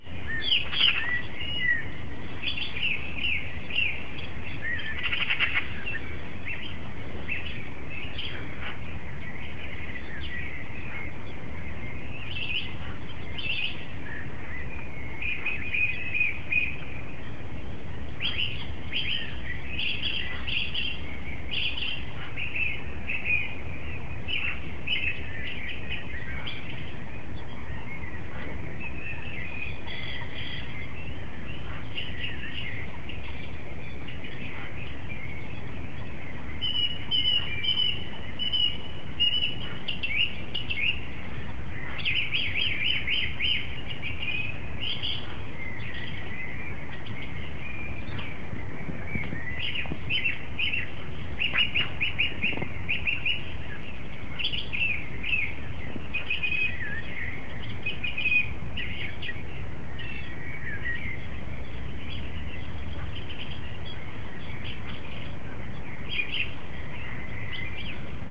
Date/Datum= 15.04.2010,
Time/Uhrzeit= 05:38Uhr
Lokation/Ort= Buschgraben-See,Berlin (south-Berlin)
Recorded with my handy.
Friendly greetings from Berlin-City,Germany!